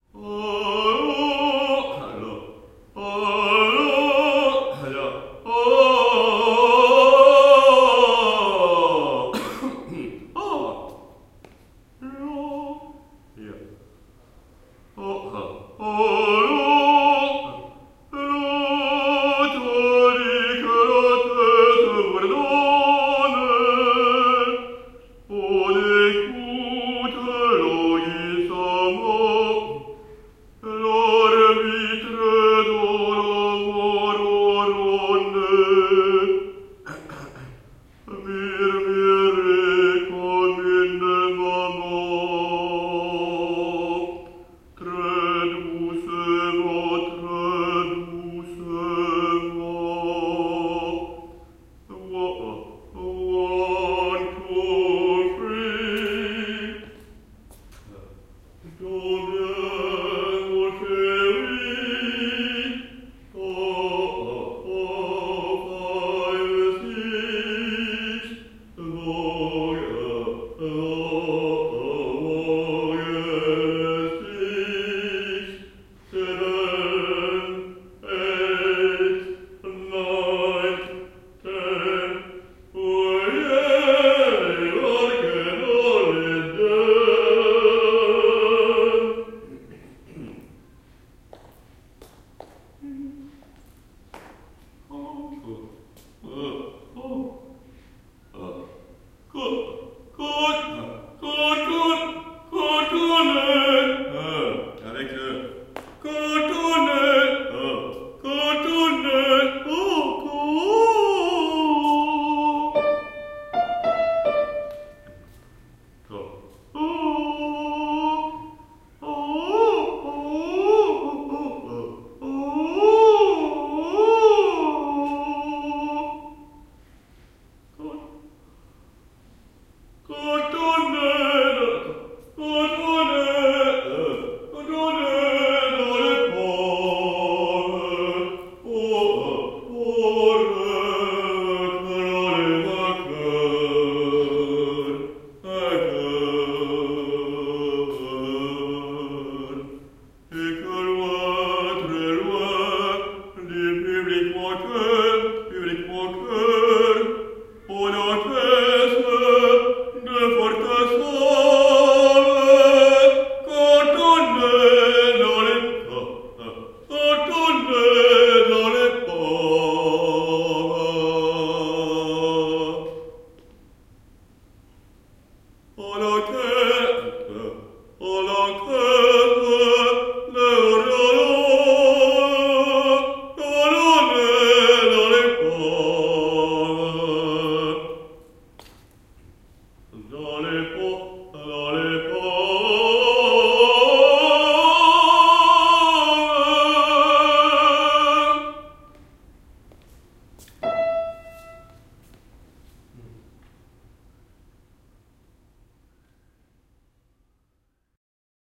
A singer is warming-up his voice before the rehearsal of a musical. He uses a piano to tune-up and does all sort of vocal exercises. Recorded in a theatre near Paris using a zoom h2n recorder in stereo M/S mode (90 degrees)
field-recording, lyrical-singing, musical, opera, practice, singer, singing, vocal-exercises, voice, warm-up